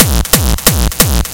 Do you LOVE Hard Dance like Gabber and Hardstyle? Do you LOVE to hear a great sounding kick that will make you cry its so good?
Watch out for This kick and Several others in the xKicks 1 Teaser in the Official Release Pack.
xKicks 1 contains 250 Original and Unique Hard Dance kicks each imported into Propellerheads Reason 6.5 and tweak out using Scream 4 and Pulveriser